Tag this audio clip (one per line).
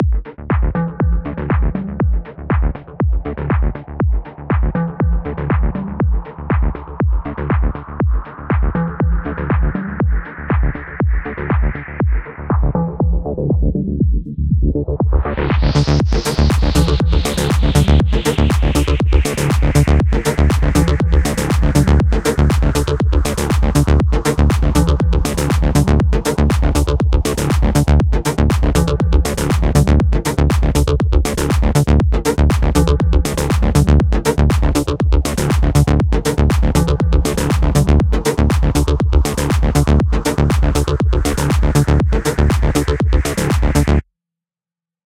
cool
music
beat
disco
retro